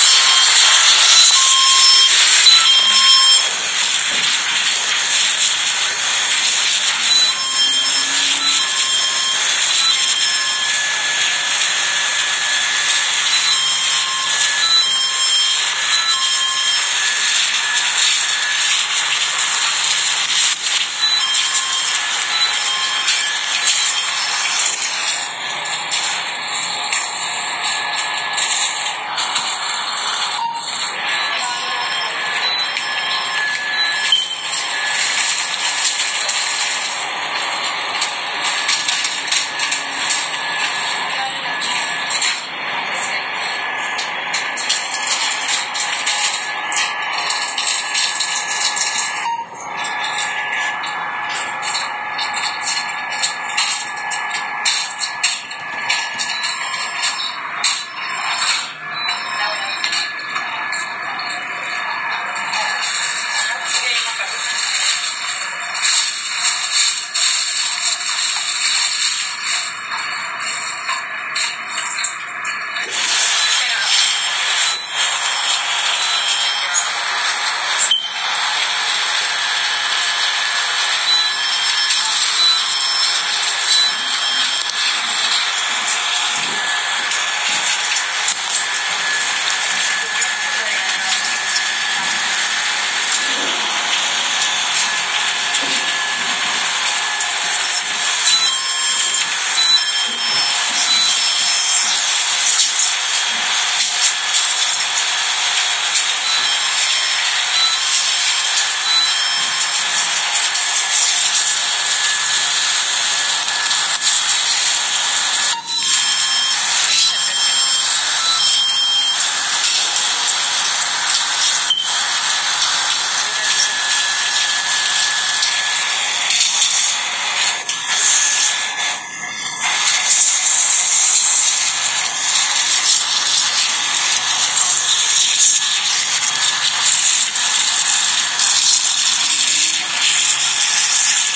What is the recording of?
Fredy Olejua
Ejercicio de registro sonoro para el proyecto SIAS-UAN con sede en Bucaramanga